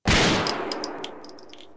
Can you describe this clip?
Desert Eagle .50AE shot
Magnum Research Desert Eagle Mk. XIX System .50AE shot with moderate echo.